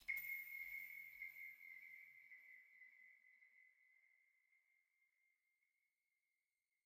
Result of a Tone2 Firebird session with several Reverbs.